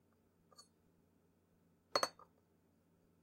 Tea cup set down 2
Porcelain teacup being set down on a ceramic saucer. Nice clink sounds.
china-click, cup, tea-cup